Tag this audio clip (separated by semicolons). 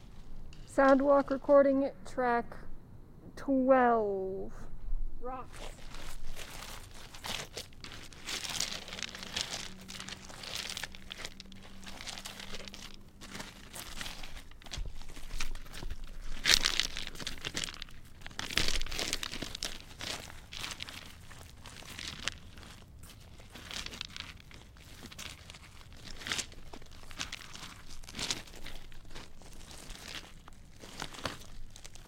stone; rocks; scraping; pebbles